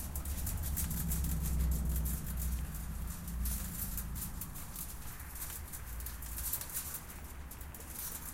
Sonic snaps CEVL Wire fence
Field recordings from Centro Escolar Vale de Lamaçaes and its surroundings, made by pupils.
aes, Fieldrecordings, Lama, Sonicsnaps